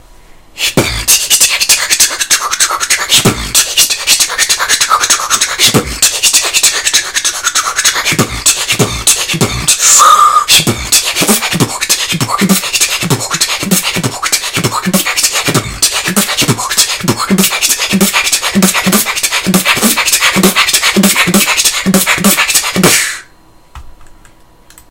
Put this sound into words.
inward drag beat 2
beatbox, drag